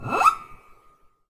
Raw audio created by forcefully scraping a metal mallet along a piano string, causing the pitch to rise as the mallet scrapes against the string. The dampers are resting on the strings.
I've uploaded this as a free sample for you to use, but do please also check out the full library I created.
An example of how you might credit is by putting this in the description/credits:
The sound was recorded using a "H1 Zoom recorder" on 8th June 2017.